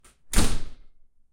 Front door slam
close, door, slam